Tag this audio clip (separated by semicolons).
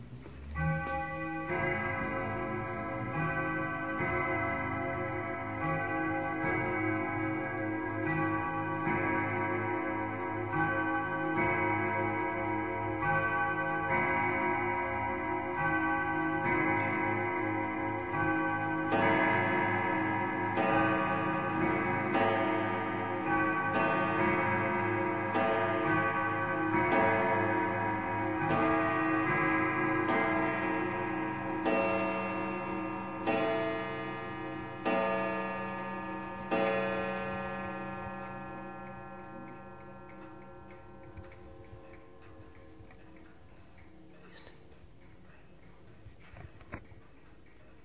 bell; clock